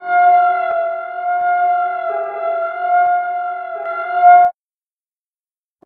PONCHON Alix 2016 2017 ScarySiren
Theme Scary Siren :
Production Step :
I record a scream. I cut it and extract two different sounds from it. I change the pitch to get the sound more high-pitched. I also change the tempo to be more slow. I separate the track in two and invert the last part. With the enveloppe tool, i change some part of my track manually. I amplify the track. I also use the reverb effect and amplify the size of the room of 60%. I used the noise reduction. At the end I fade out. I used the noise reduction.
Description :
This sound look like a siren or an alarm. Because the sound is high-pitched, it award an horrific aspect to the track. For me this siren can take place in an old and creepy factory. In some aspect, there is some metallic noise in this sound. The no-regularity of the siren also participate to this strangeness feeling.
Typologie de Schaeffer :
Masse: Tonique
Timbre harmonique: Acide
Grain: Itération
Allure: Naturelle
Dynamique: Violente
Profil Mélodique: Descendant puis ascendant
alarm, creepy, emergency, high-pitched, horror, scary, sinister, siren, terrifying, terror